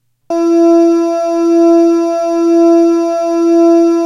Created Note produced by looping short sections of 2 takes of the same note on an acoustic guitar producing a Beat Frequency of Approximately 1HZ.